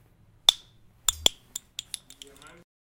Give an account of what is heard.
Cap Drop
This is a recording of the sound of an Expo marker cap falling. It hit the mic thought so it is a little loud.
Cap, Cap-Dropping, Crashing-on-the-floor, Dropping